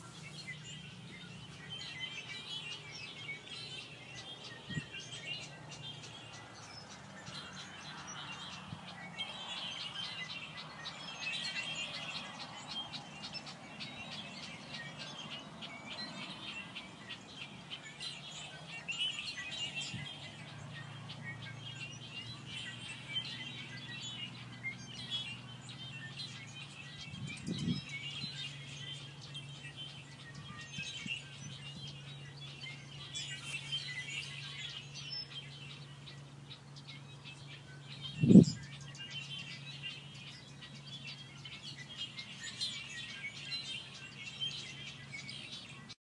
Medley of birds singing away on a cloudy day. Recorded on an iPhone in Sacramento CA.